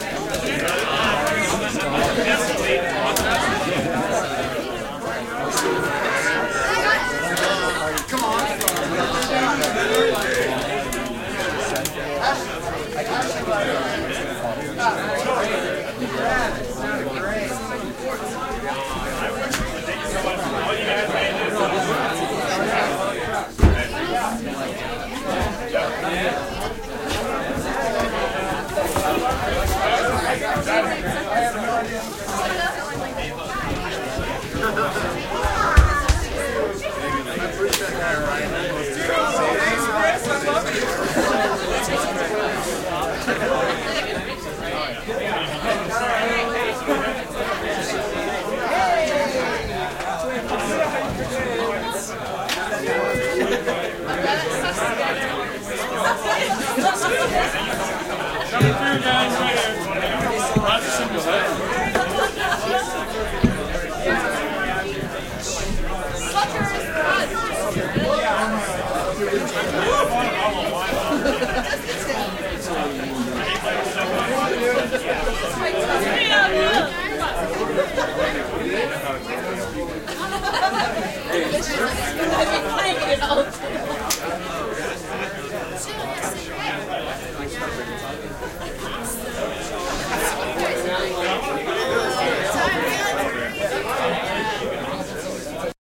b and m crowd
I recorded some local bands at someones house, this is the crowd after the last band played. Recorded with AT4021 mics in XY into a modified Marantz PMD661.
crowd, people, field-recording, inside, ambient, indoors